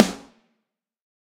NP SNARE 002
Processed real snare drums from various sources. This sample contains a modern pop-rock type snare with a cheap, trashy sample behind it to add texture.
drum, real, sample, snare